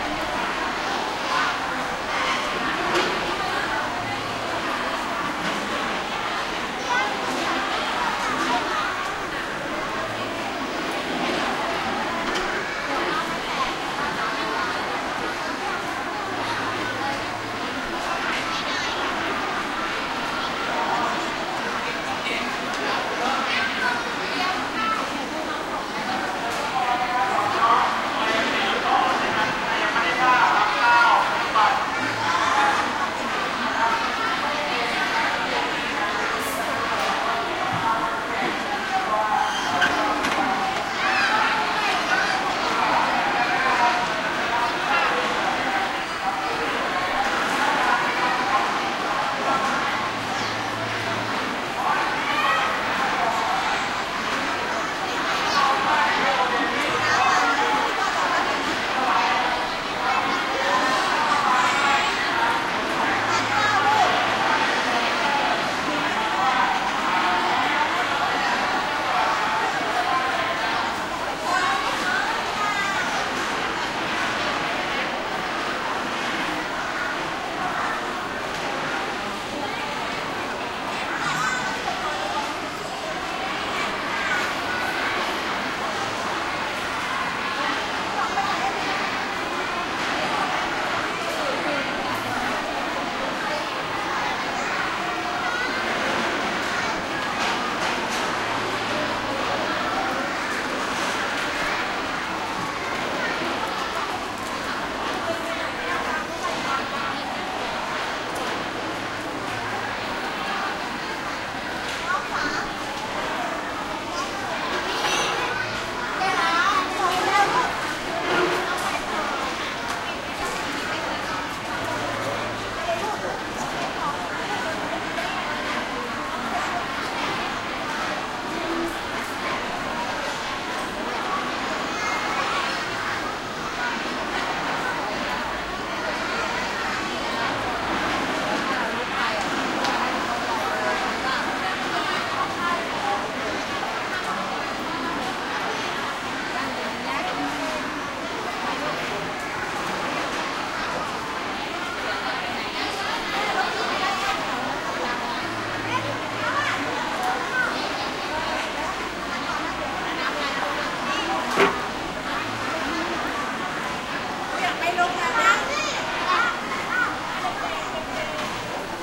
Thailand crowd int large schoolchildren wide indistinct group in zoo cafeteria busy movement chairs slide2 +distant megaphone voice
cafeteria, int, movement, Thailand, schoolchildren, large, busy, field-recording, crowd